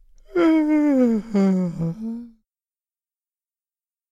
AS076583 Boredom
voice of user AS076583
man, tedium, voice, human, ennui, male, wordless, vocal, weariness, restlessness, boredom